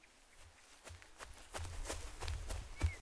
grass-running
A recording of me running in grass.
Suitable for games.